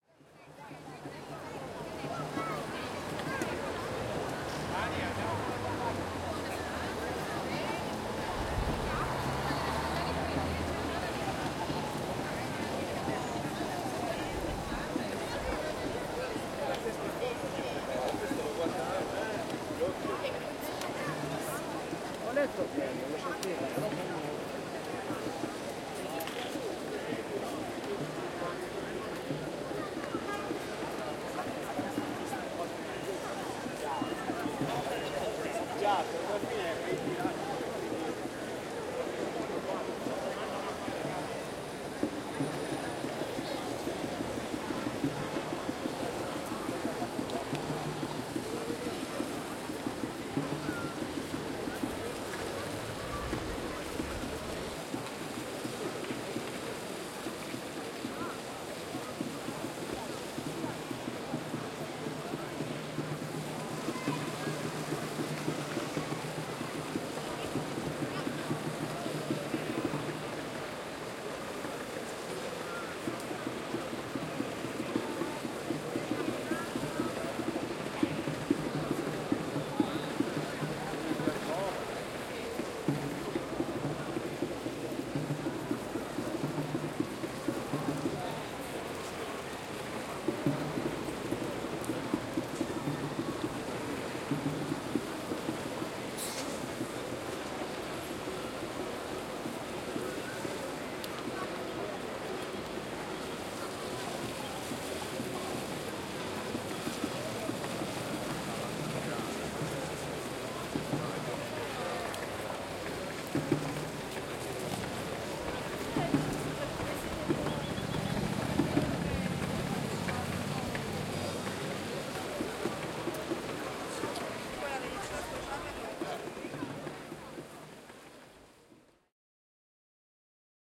Torino, Piazza Castello
14th June h 05:45 pm
crowd, children, traffic, bongos
ambiance, ambience, ambient, bongos, children, crowd, field-recording, italy, people, soundscape